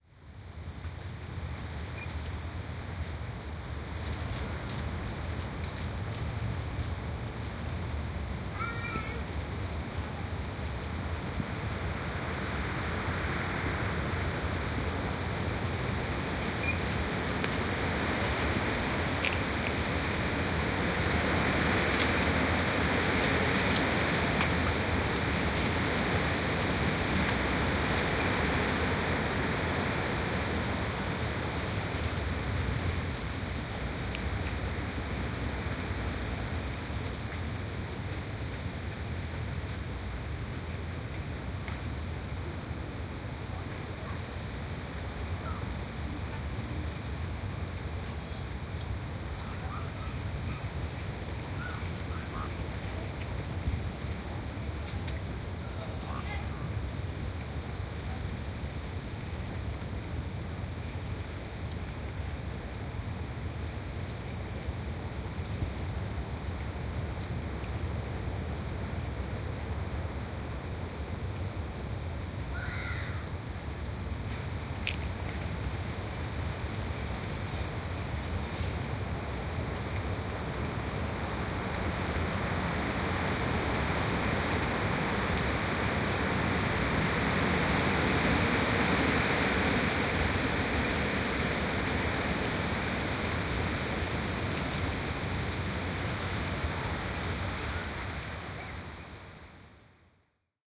Dante's Wind
Binaural recording of wind and natural environment, from "Dante's View", a scenic lookout on the side of a mountain in Griffith Park, Los Angeles. This is a public area and unfortunate human sounds are present.
park, nature, field-recording, leaves, gusts, wind, environment, birds, rustling